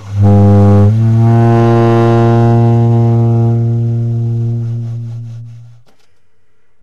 Tenor Sax bb1
The format is ready to use in sampletank but obviously can be imported to other samplers. The collection includes multiple articulations for a realistic performance.
jazz, sampled-instruments, sax, saxophone, tenor-sax, vst, woodwind